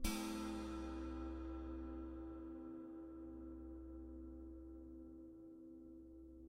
China cymbal scraped.
china-cymbal, scrape